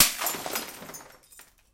One of a pack of sounds, recorded in an abandoned industrial complex.
Recorded with a Zoom H2.